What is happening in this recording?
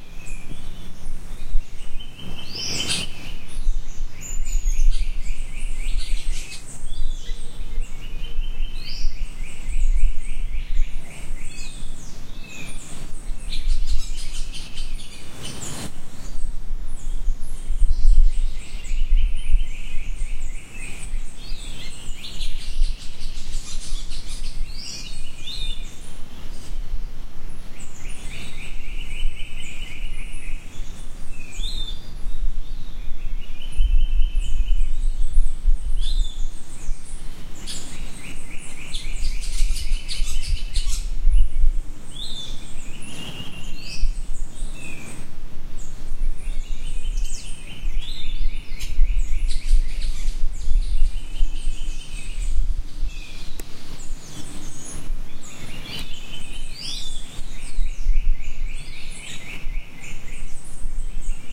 Field recording taken a little after dawn in Costa Rica. Within the first 30 minutes the jungle sounds go from cacophonous to general life sounds; this is from the latter time.